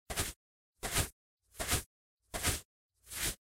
Walking in the sand.